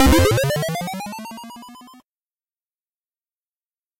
Power up collection sound